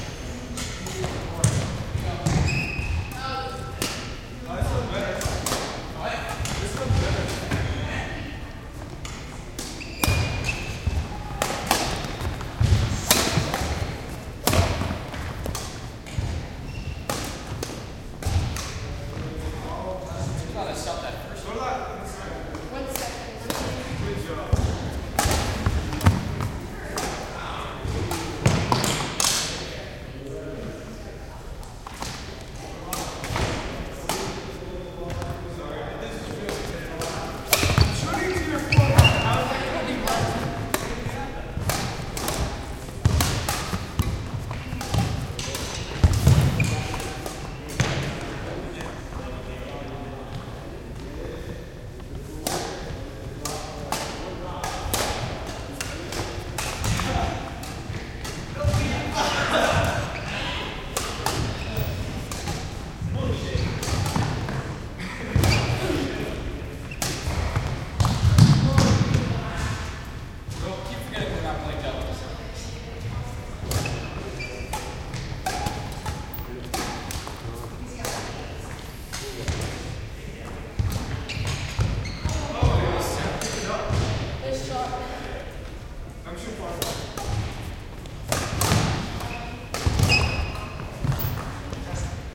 high school gym playing badminton Montreal, Canada
badminton, Canada, gym, high, playing, school